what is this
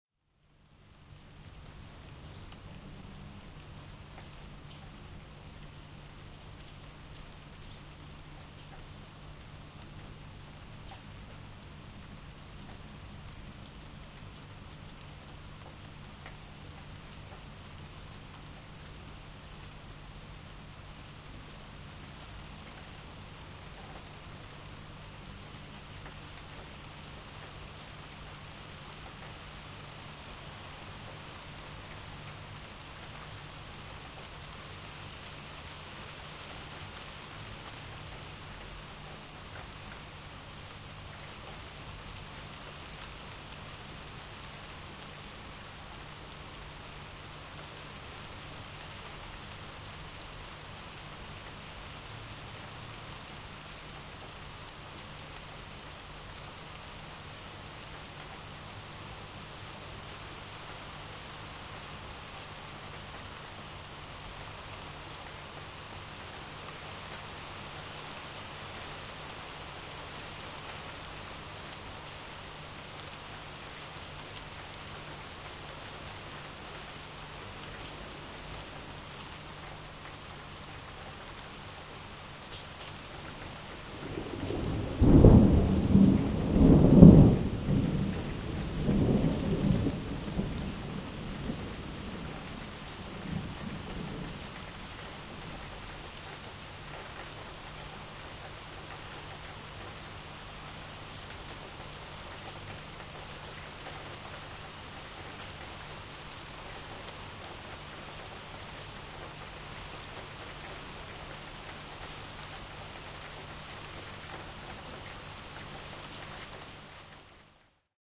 Raining in Bangkok 20180916-2
Recording the rain and a thunder inside my house.
Microphone: 12Guage Black212
Preamp: Focusrite Scarlett
storm, lightning, field-recording, thunder, weather, nature, rain